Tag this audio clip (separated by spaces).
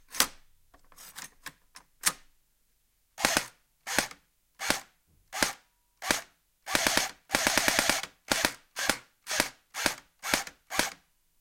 aeg Airsoft auto bb bbs cock cocked dry fire full gun loaded M14 pellet Rifle semi